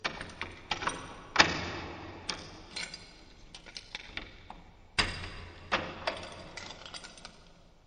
Clattering Keys 04 processed 01
clattering, metal, rattle, rattling, shake, shaked, shaking